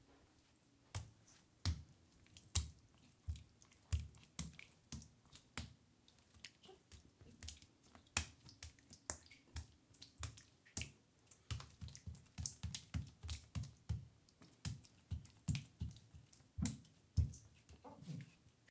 Footsteps, barefoot on wet tile